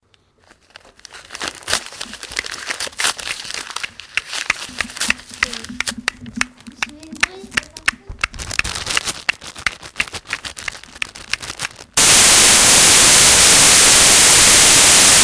French students from La Roche des Gr&es; school, Messac used MySounds to create this composition.